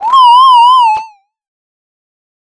Attack Zound-121
Similar to "Attack Zound-116", but shorter. This sound was created using the Waldorf Attack VSTi within Cubase SX.
electronic, soundeffect